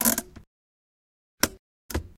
RFX Handbreak Up and Down
Sound recorded of a handbrake, Up and down.
Mic Production